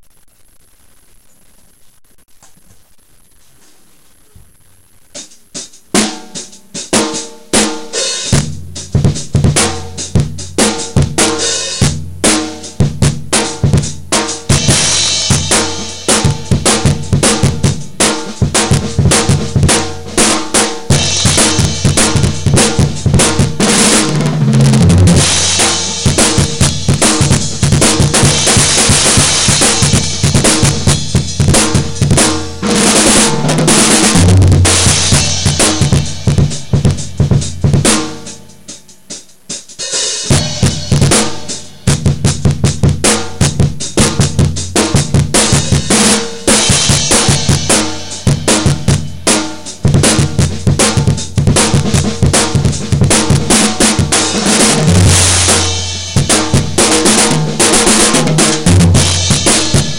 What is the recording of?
Drums recorded a long time ago with I don't know what kind of crap mic.